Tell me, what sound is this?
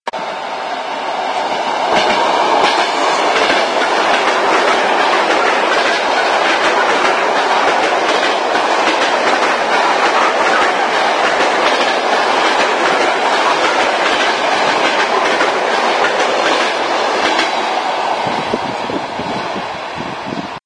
A train passing by in Russia